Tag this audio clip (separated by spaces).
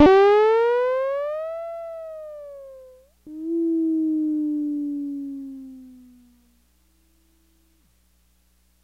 ghost rising falling nord hoot digital